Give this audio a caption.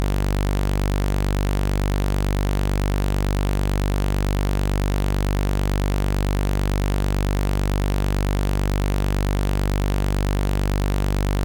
Doepfer A-110-1 VCO Saw - G1
Sample of the Doepfer A-110-1 sawtooth output.
Captured using a RME Babyface and Cubase.
A-100, analog, analogue, electronic, Eurorack, falling-slope, modular, multi-sample, negative, oscillator, raw, sample, saw, sawtooth, slope, synthesizer, VCO, wave, waveform